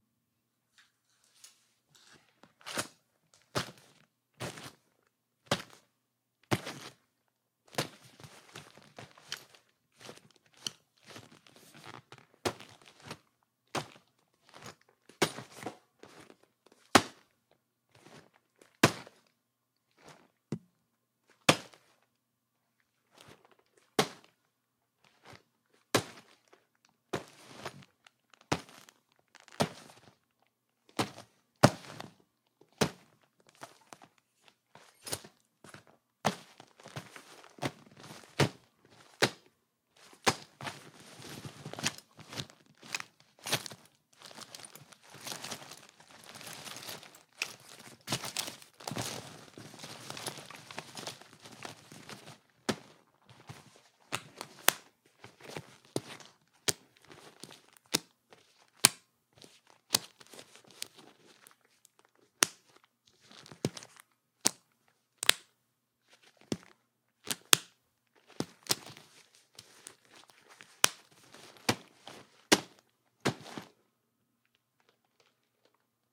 Woman messenger bag purse, drop pickup rummage handle
General foley sounds for a woman's bag
rummage, drop, purse, bag, woman